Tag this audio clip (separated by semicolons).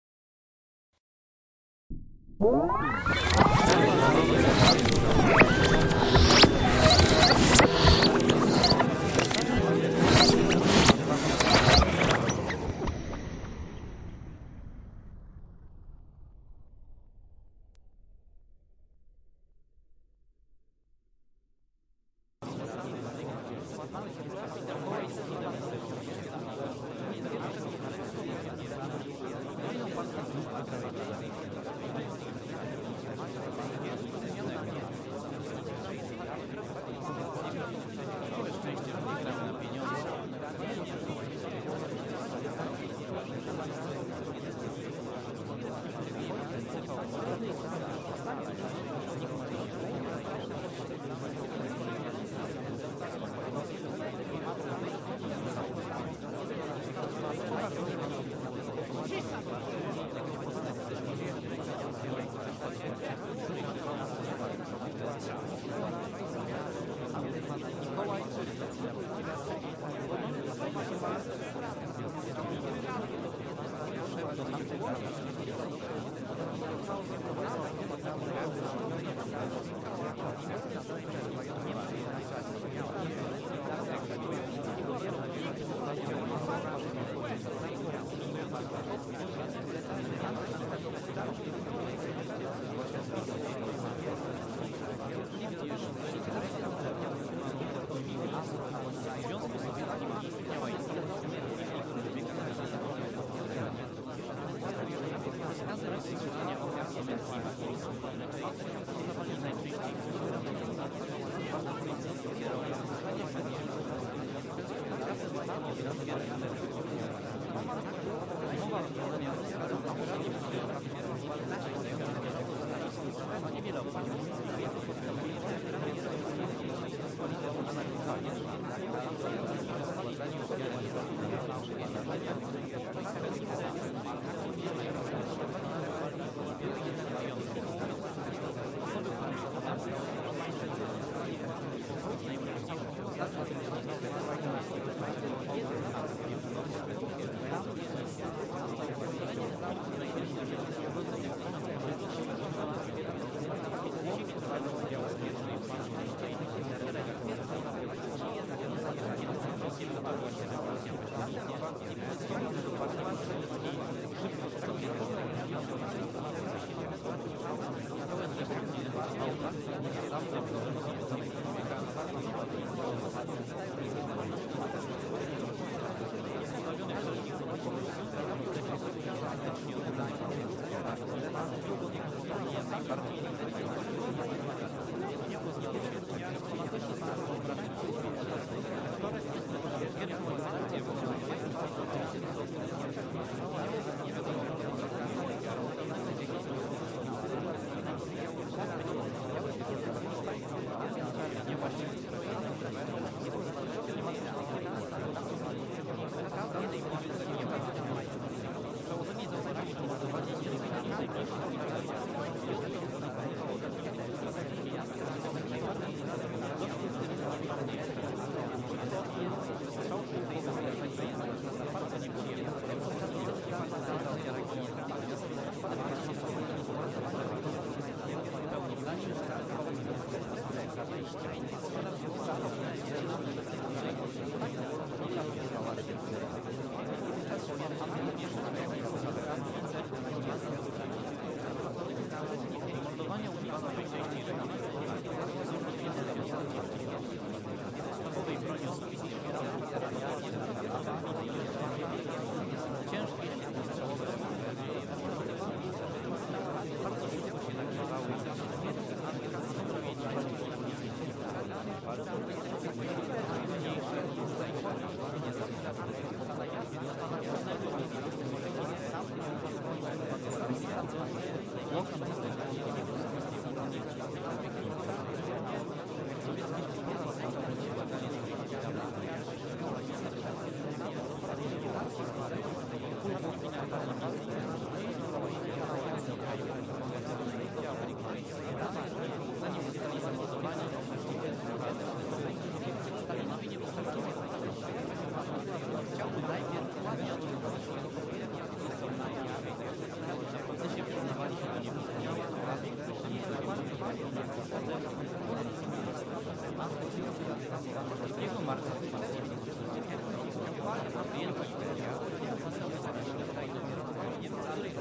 ayahuasca; mind; psyche; psychedelic; time; travel; trip; wipe